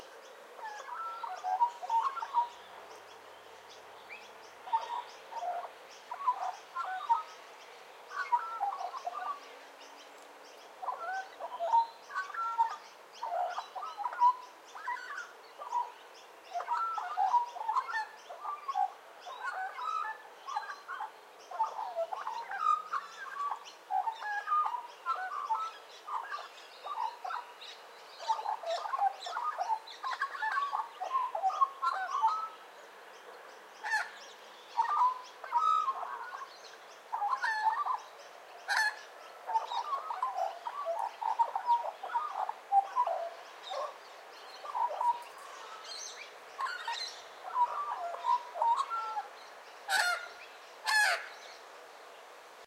Australian Magpie - Gymnorhina Tibicen - Carroling
The sound of an Australian Magpie (Gymnorhina Tibicen) standing on my front lawn, this sound is known as 'carolling' with a few squawks thrown in at the end (the squawks are a more common magpie sound). In the background you can faintly hear the sound of some 'Noisy Miners' (birds) and Rainbow Lorikeets. This is a classic Australian suburban sound, and one that expatriated Australians miss when overseas for extended periods. I have filtered out low frequencies (unrelated to the bird) from traffic in the background. Recording distance ~ 5 meters. Recording chain: Rode NT4 (stereo mic) – Sound Devices Mix Pre (Pre amp) – Edirol R-09 digital recorder.
australian-magpie, avian, bird, carolling, carroling, gymnorhina-tibicen, magpie